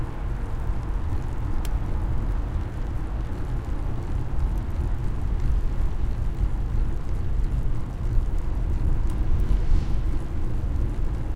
SUBIDA A PATIOS Pedaleo-rodamiento en acelere
Proyecto SIAS-UAN, trabjo relacionado a la bicicleta como objeto sonoro en contexto de paisaje. Subida y bajada a Patios Bogotá-La Calera. Registros realizados por: Jorge Mario Díaz Matajira, Juan Fernando Parra y Julio Ernesto Avellaneda el 9 de diciembre de 2019, con grabadores zoom H6
bicycle-sounds, paisaje-sonoro, Proyecto-SIAS, soundscape